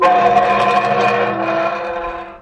Heavy wrought-iron cemetery gate opening. Short sample of the shivery groaning sound of the hinges as the gate is moved. Field recording which has been processed (trimmed and normalized).